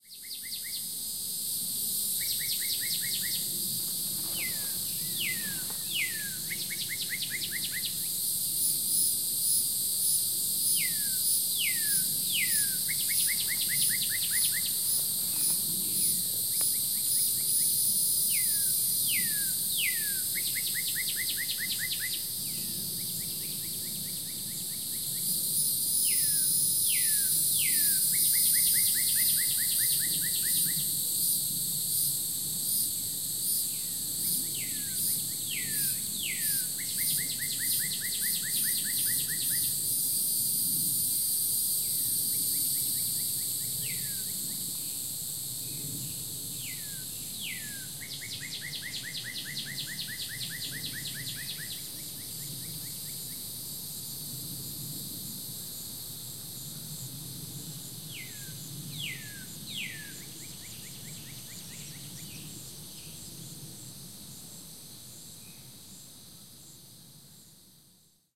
Recording made about 7AM on a warm summer morning in late July. Recording made with my Zoom H4N using my home-made parabolic microphone. Notice the ebb and flow of the insects, almost mesmerizing.